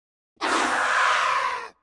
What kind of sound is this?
cell screams 4 ob
scream, processed